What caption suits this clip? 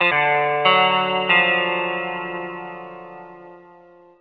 GUITAR LOOP 1
guitar, loop